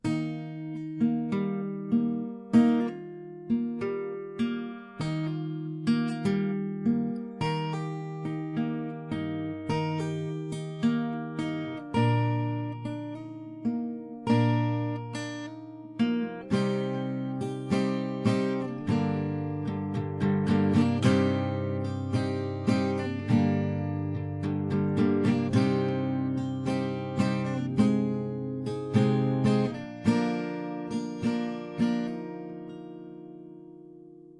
Unmodified Guitar
Self-written and recorded music
Guitar, Acoustic, Music